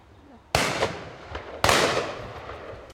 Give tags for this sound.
side-by-side shot season discharge shooting gun pheasants fire shoot firing over-and-under gunshot shotgun bang

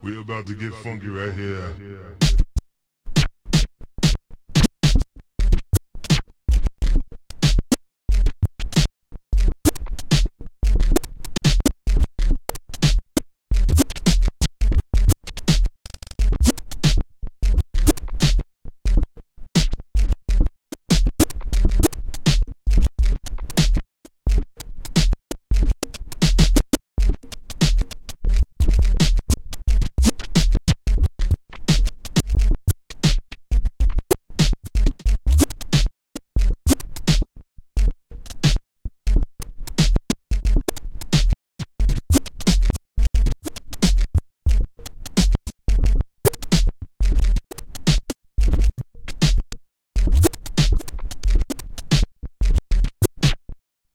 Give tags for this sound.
Beat Break DR-05 Drum Drums Hi-Hats Kick Record Sample Sampled Scratch Scratching Snare Tascam Turntablism Vinyl

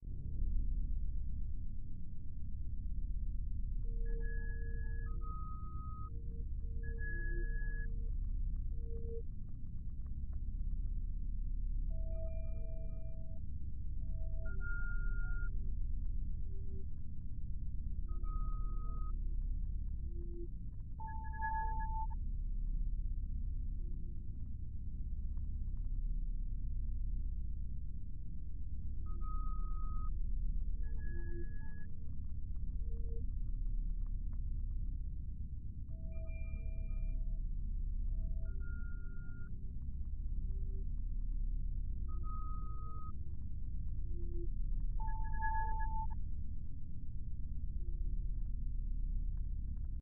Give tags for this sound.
electronic synth digital scifi fx engine effect future sciencefiction beep humm computing soundesign soundeffect sci-fi spaceship noise vintage space computer

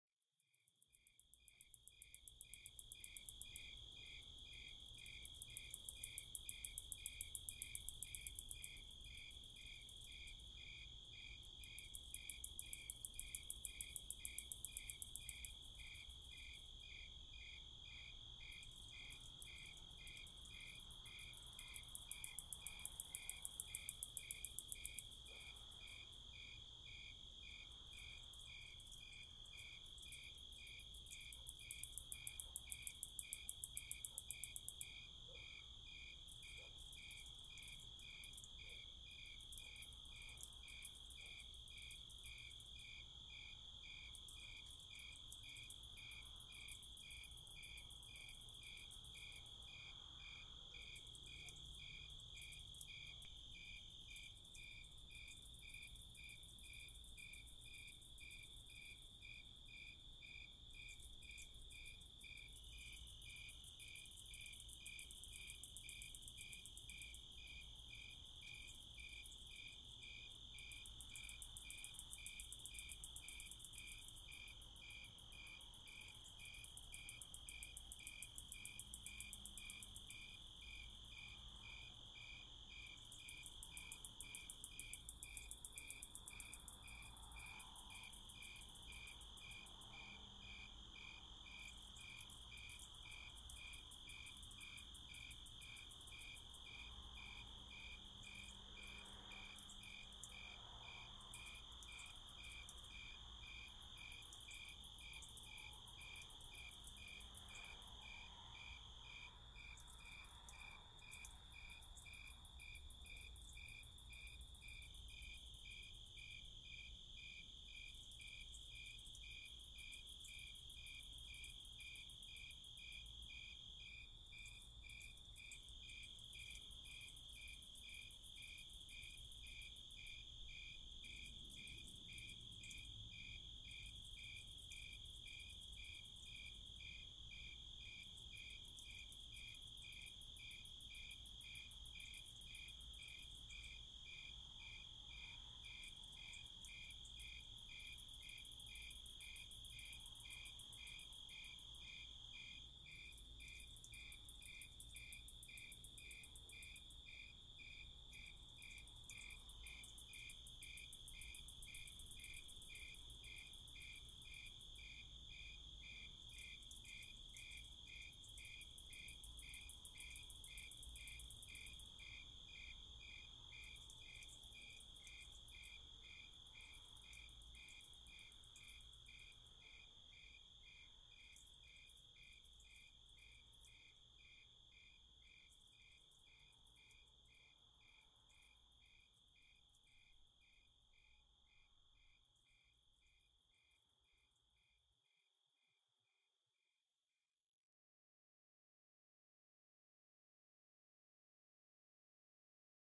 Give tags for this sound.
summer field-recording nature backyard insects night ambiance crickets

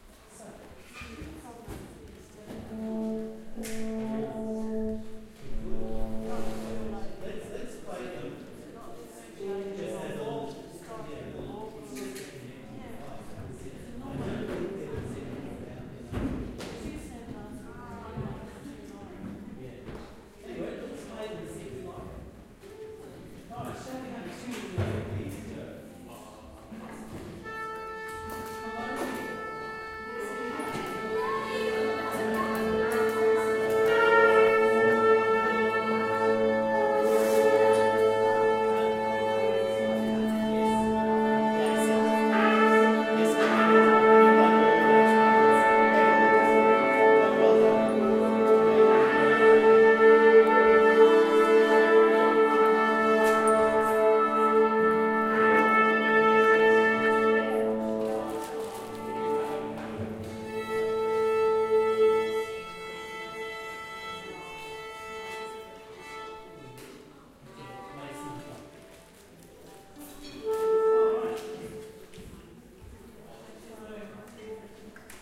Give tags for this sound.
Orchestra Cello Trumpet Trombon Oboe Viola Violin

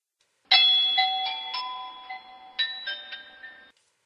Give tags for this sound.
Field-recording Studio

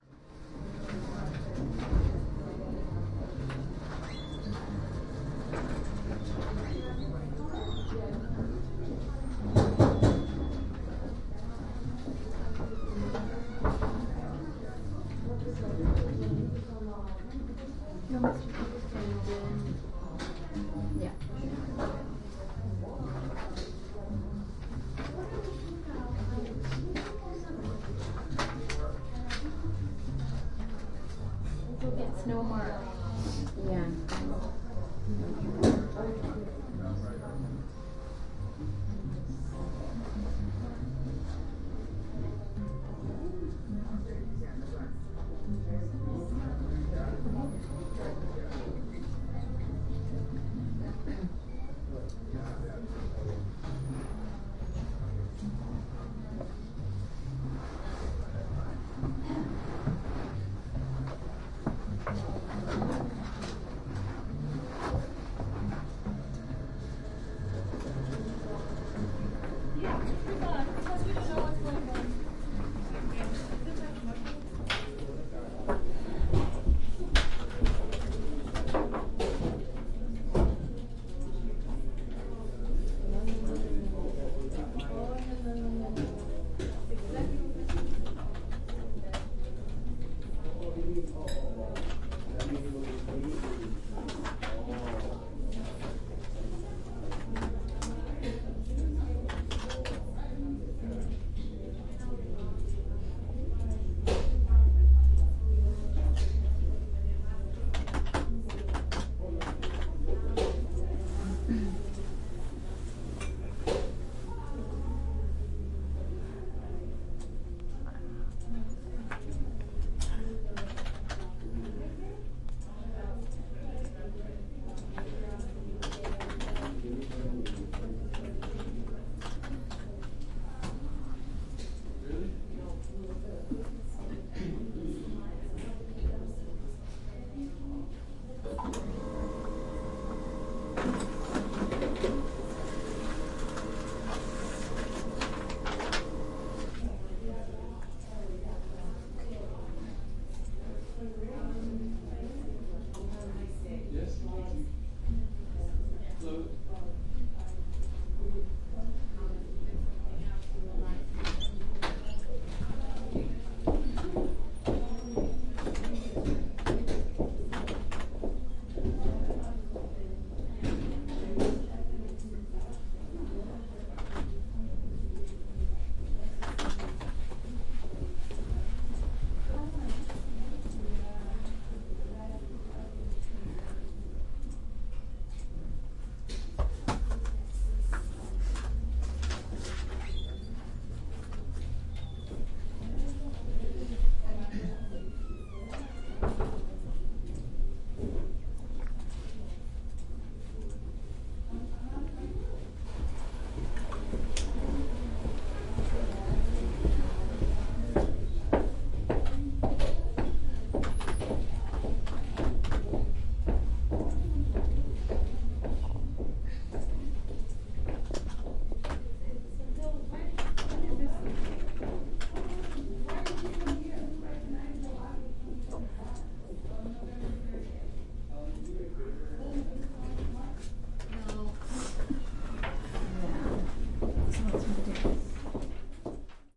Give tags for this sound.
office ambience bank background-sound general-noise

